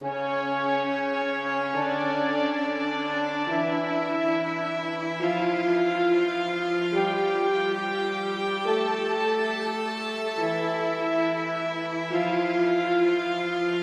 This is a string sample I made with the vst DSK strings.
classic, ensemble, orchestra, orchestral, strings